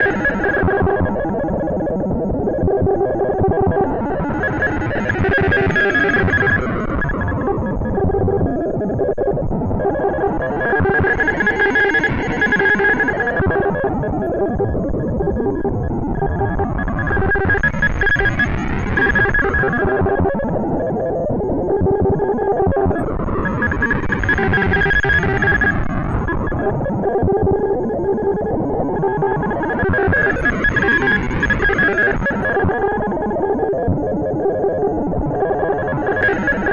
Glassy random noise drone
Its a square wave signal going through a low pass filter. The frequency is being swept by a sine LFO. That audio signal goes through analog based bit crusher (called bug crusher). The crush level is being modulated by Super Psycho LFO (pseudo random modulator). Overall sounds like it belongs in a 60s sci-fi movie.